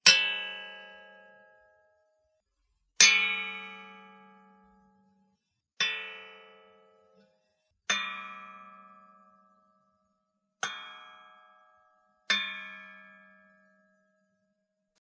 Metal bell being hit; sharp impact. Sounds like someone getting hit with a frying pan.
Cow-Bell, Hit, Impact, Metal, Metallic, Sword-Metal